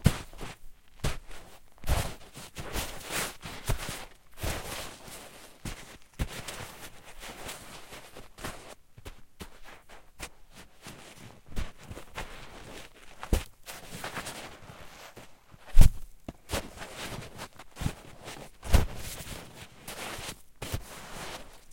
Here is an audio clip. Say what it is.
Slagsmål i snö 1 (knytnävsslag)
Beating in snow. Recorded with Zoom H4.
snow, beating